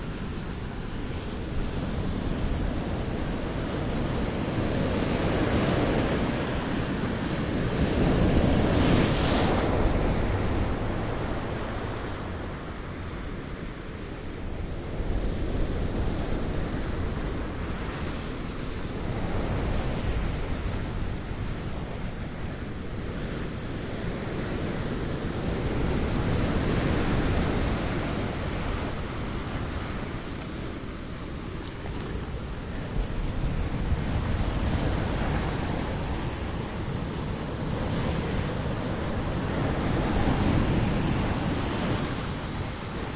Quiet day, close recording of the breaking waves.